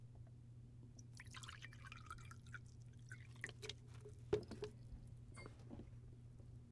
These are various subtle drink mixing sounds including bottle clinking, swirling a drink, pouring a drink into a whiskey glass, ice cubes dropping into a glass. AT MKE 600 into a Zoom H6n. No edits, EQ, compression etc. There is some low-mid industrial noise somewhere around 300hz. Purists might want to high-pass that out.
bar, drink, glass, cocktail, alcohol, ice, ice-cube